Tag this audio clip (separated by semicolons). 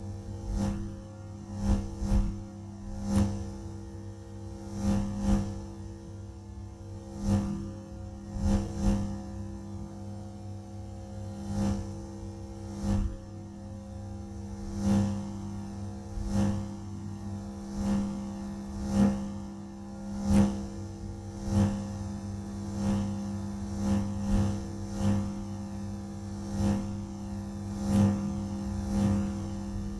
light-saber; weapons; sfx; sci-fi